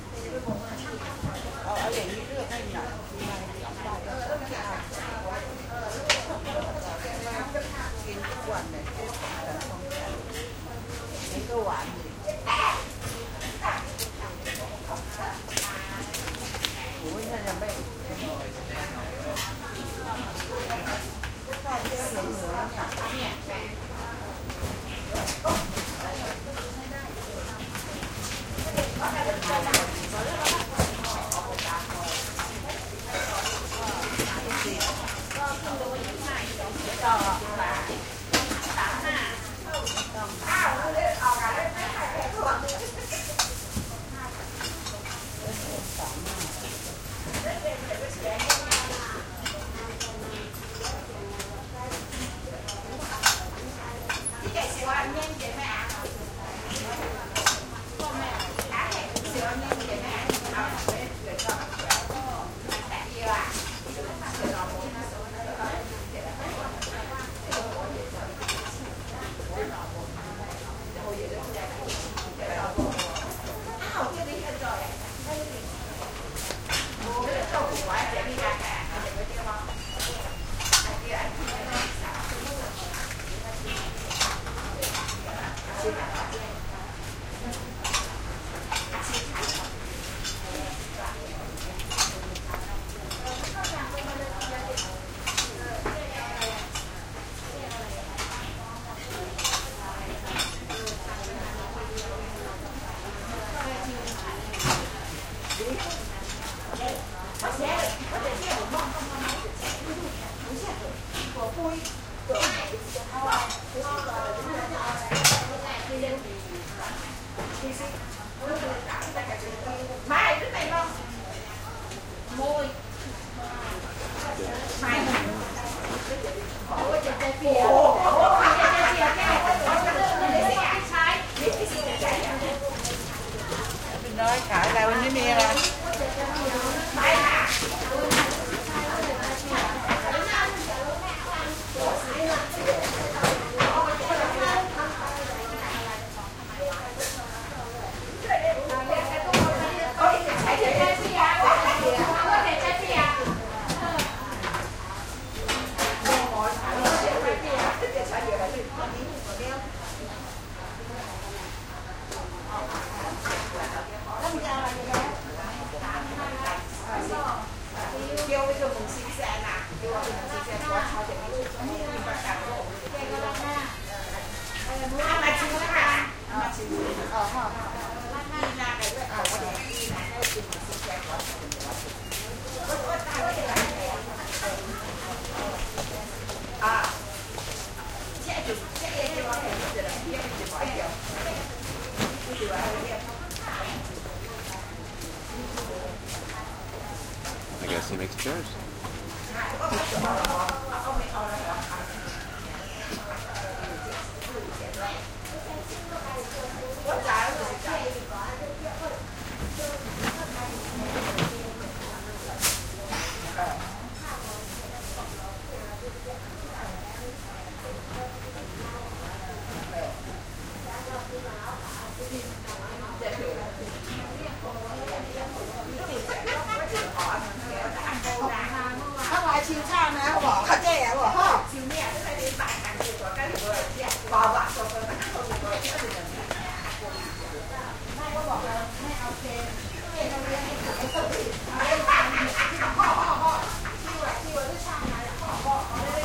Thailand Bangkok, Chinatown side street market activity motorcycles2 women voices lively conversation right side
Thailand Bangkok, Chinatown side street market activity motorcycles women voices lively conversation right side
activity, Bangkok, Chinatown, conversation, field-recording, market, motorcycles, Thailand